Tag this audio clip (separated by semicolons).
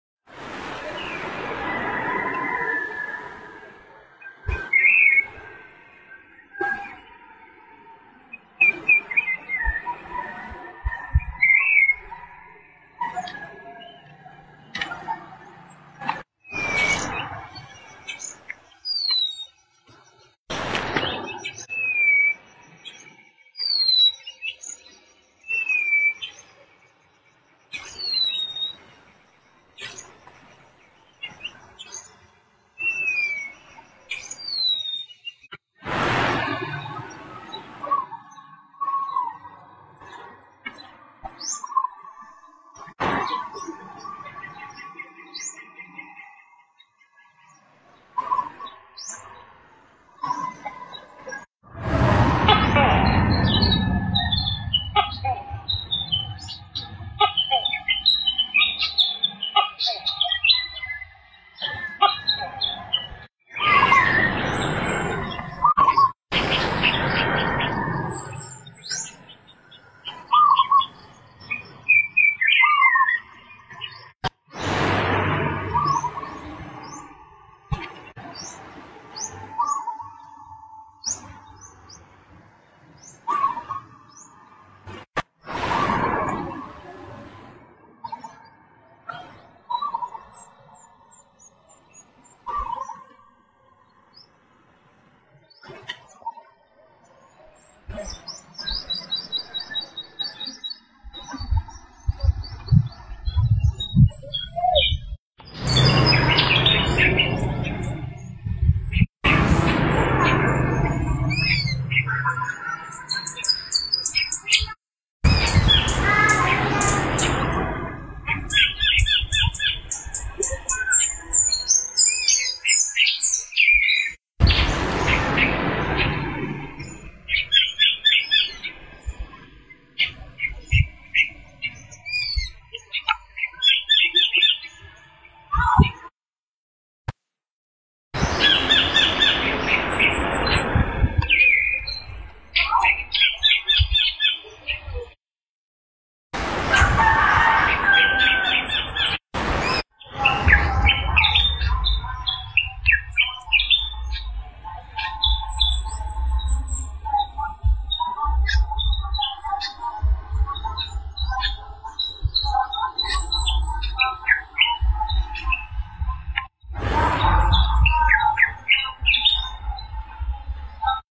birds; Philippines; field-recording